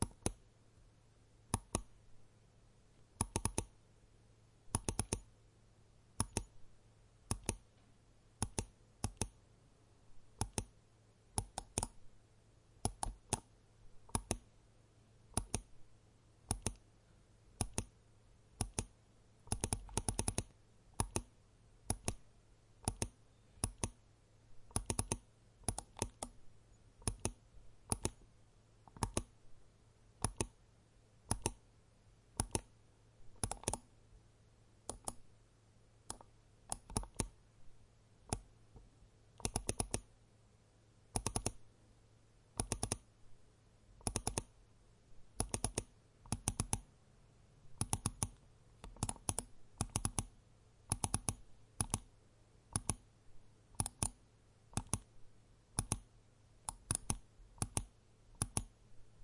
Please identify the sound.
Click Computer
Macbook, Mouse, Computer, Click, Laptop